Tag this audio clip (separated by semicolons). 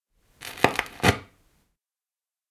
crackle; dusty-vinyl; end-crackle; gramophone; gramophone-noise; knaster; LP; phonograph; pop; record; record-player; surface-noise; turntable; vinyl; vinyl-record-player